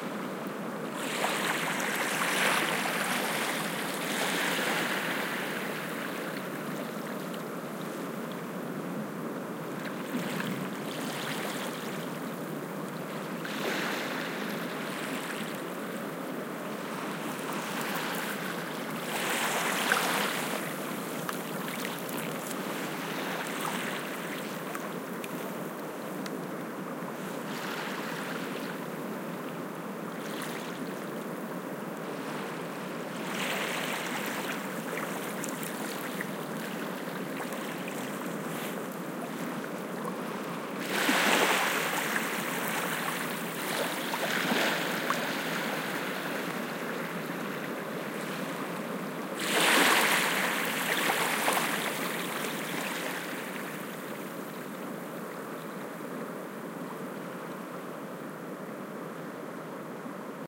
20070820.fjord.beach.03
sounds at a fjord's beach at Qaleragdlit. You can hear wawes splashing, a nearby stream, wind rumbling... Recorded with a pair of Soundman OKM mics plugged into a Fel BMA1 preamp. Recorder was an iRiver H320.
environmental-sounds-research, field-recording, greenland, stream